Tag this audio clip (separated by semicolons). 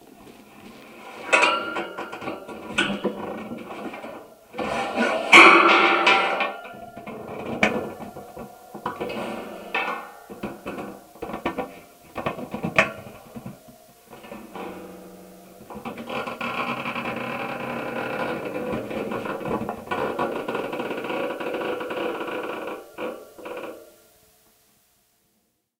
echo; enormous; gigantic; hand; impulse